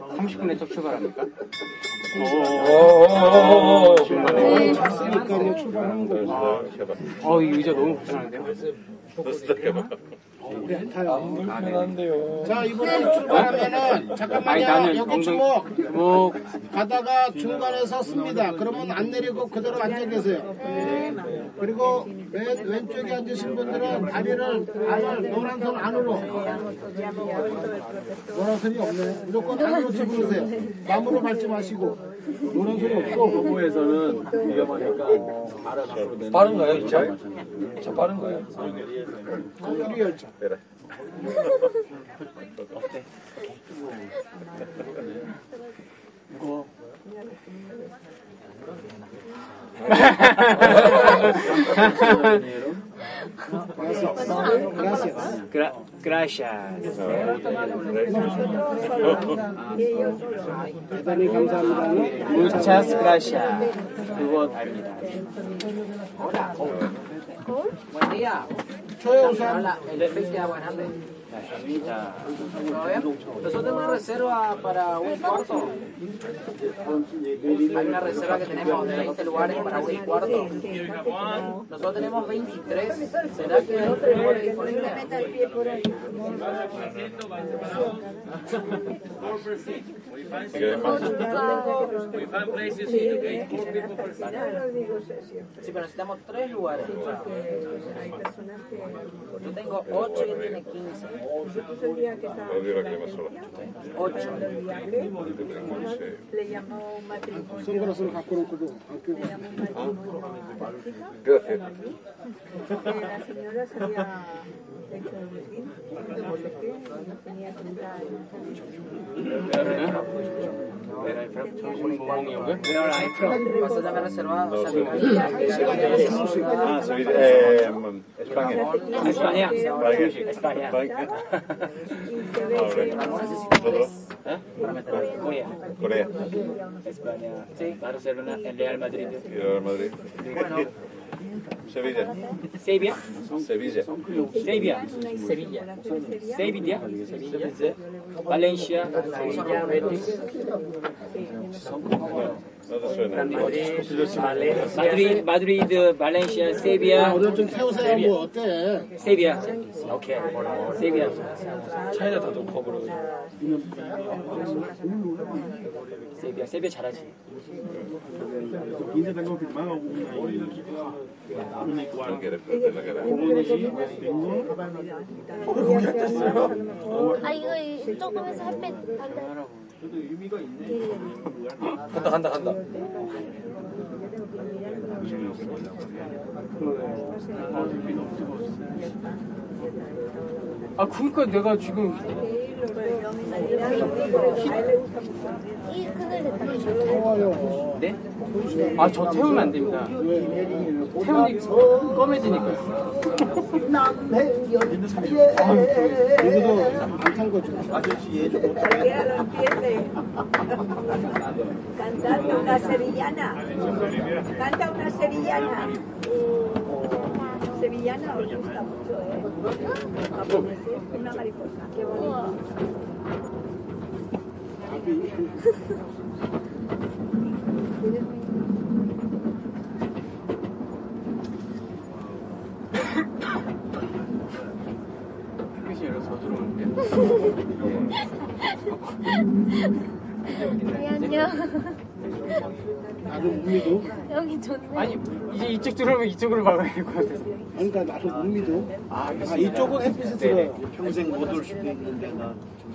20160308 07.tourist.talk
Soundman OKM capsules into FEL Microphone Amplifier BMA2, PCM-M10 recorder
field-recording, Korean, people, Spanish, talk, tourists, train